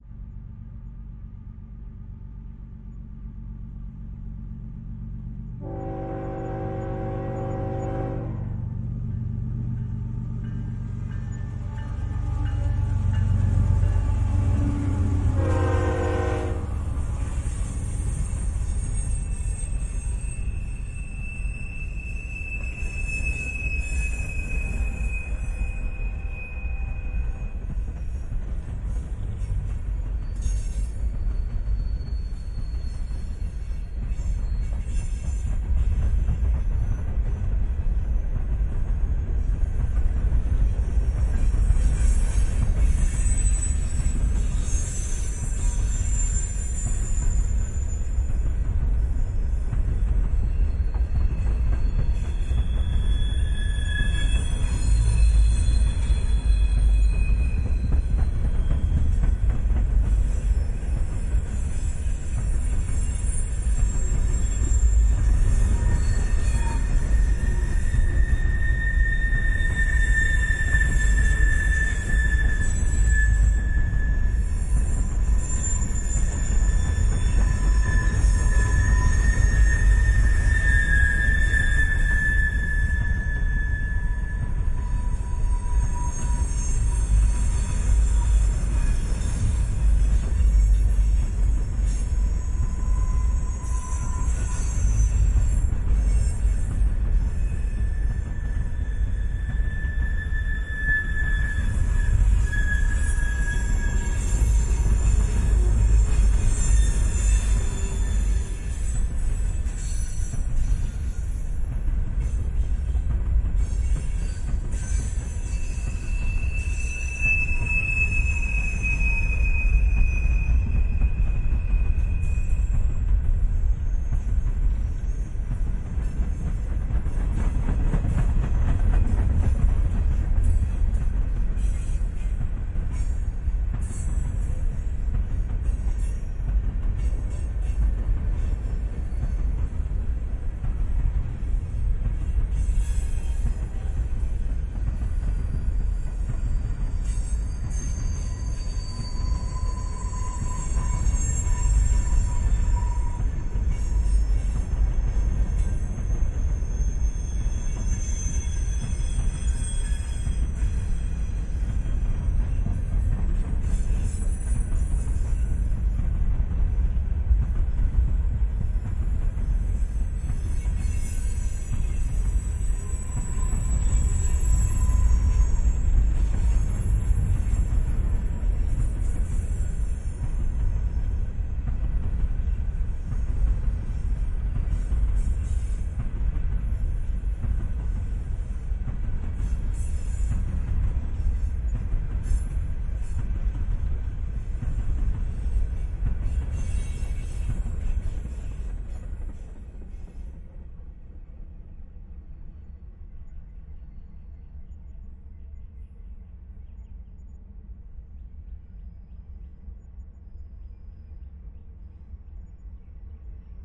Freight Train Slow - Mixdown
Freight train passing by with horn and slow wheel noise. Lots of metal. Zoom H6n with 2x AT2020 mics for low end. Used an FFT EQ to fatten this up. This is a mixdown.
Shennandoah Junction, WV - August 2016
field-recording, industrial, stereo, train